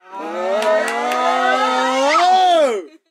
VOCAL CRESCENDO 02
This sample pack contains people making jolly noises for a "party track" which was part of a cheerful, upbeat record. Original tempo was 129BPM. This is a vocal crescendo.
female, male, party, shots, vocals